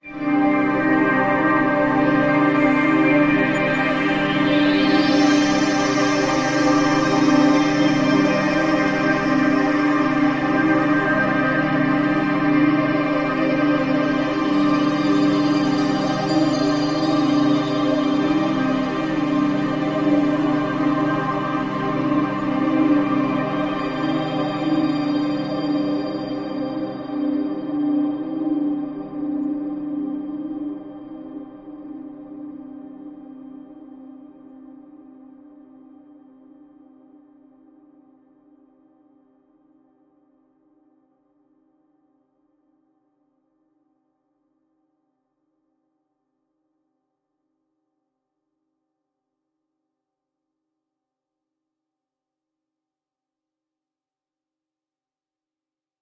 Weird Dimension
acapella, angelic, angels, baroque, bittersweet, choir, choral, church, cinematic, classical, Dubstep, heaven, heavenly, Lush, music, Pads, religion, religious, sing, Sound, space, synthetic, Time